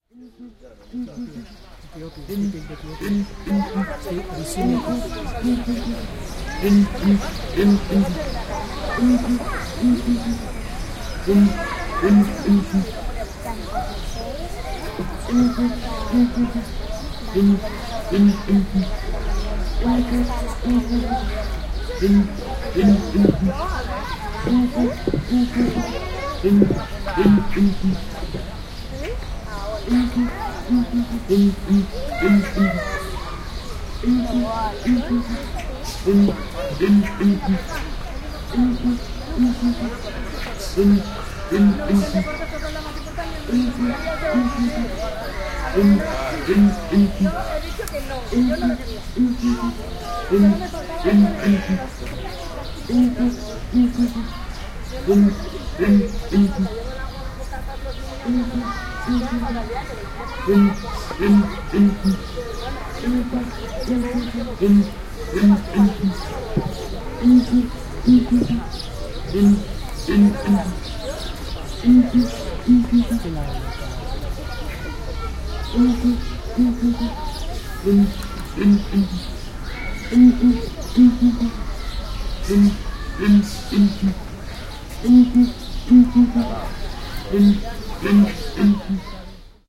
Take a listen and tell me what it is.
Calao Terrestre 01
Song of two males of Southern Ground Hornbill (Calao terrestre, scientific name: Bucorvus leadbeateri) and ambient sounds of the zoo.
animals, Barcelona, birds, calao, field-recording, Spain, Zoo, ZooSonor